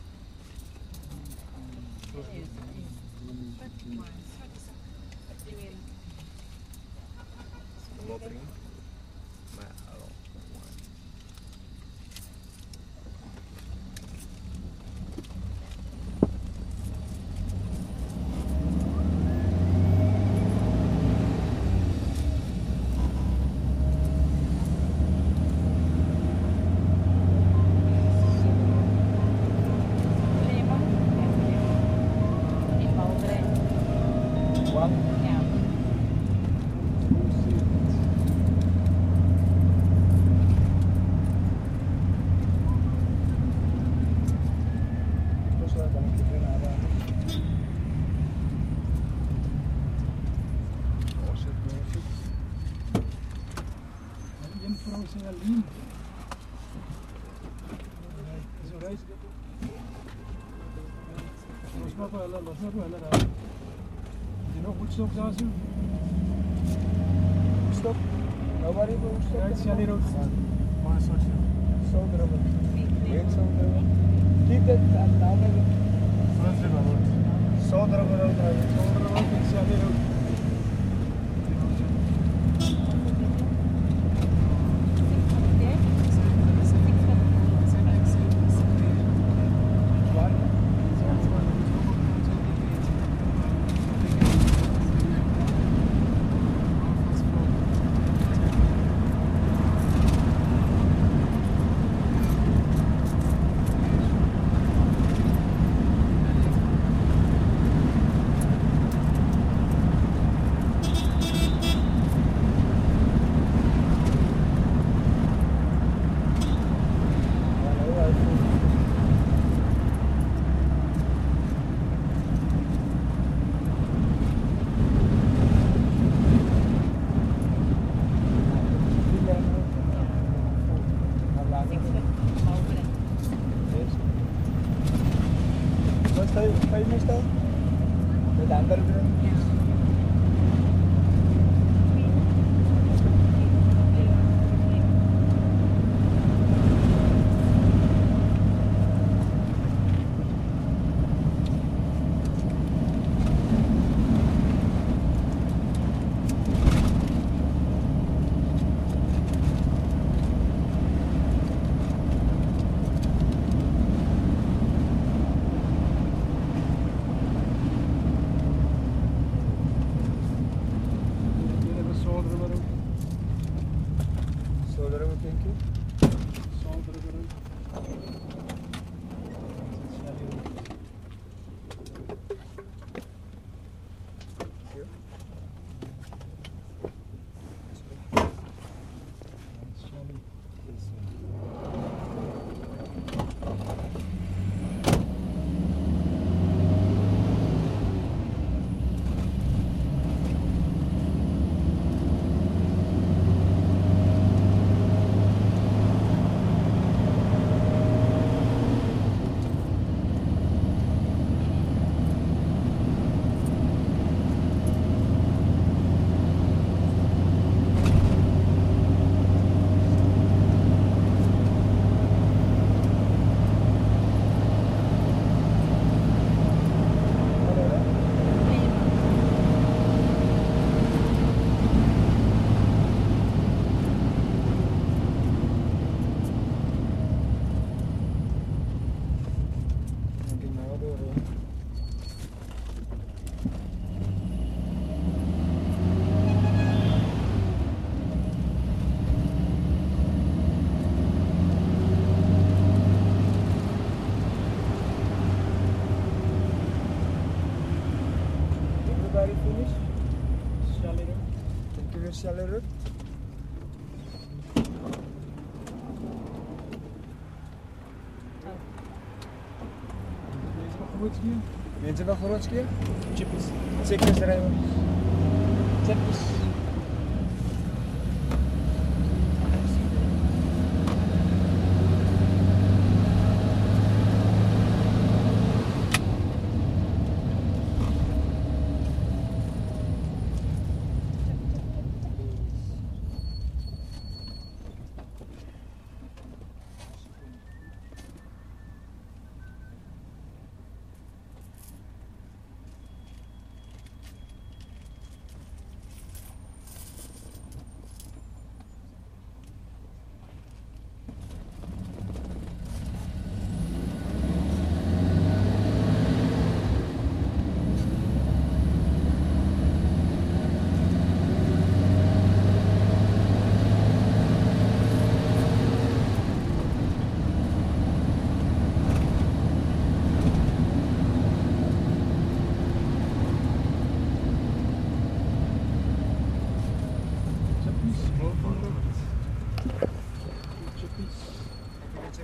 combi taxi from Cape Town central taxi rank to Observatory (part2: Woodstock to Obs, less going on)
cape-town, field-recording, south-africa, street, taxi, urban